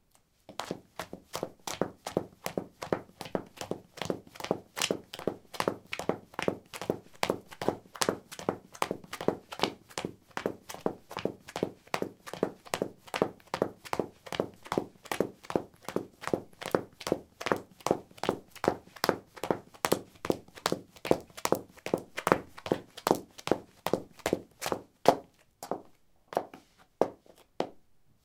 Running on concrete: high heels. Recorded with a ZOOM H2 in a basement of a house, normalized with Audacity.